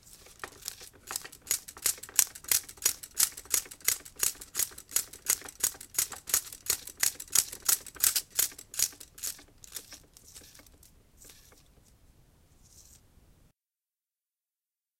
8mm film flopping manual
8mm reel reaches the end and while it continues to rotate, the loose end 'flops' against the machine.For this version, I rotated the reel by hand, so the sound is cleaner. The projector's motor now of course is off.
flopping, film